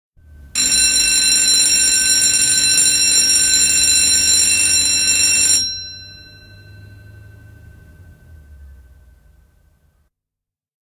Koulun kello, sähkö / School bell, electronic from the 1970s, ringing in the school yard, exterior
Koulun kello soi, kilisee koulun pihalla. 1970-luku. Ulko.
Paikka/Place: Suomi / Finland / Nummela
Aika/Date: 15.09.1971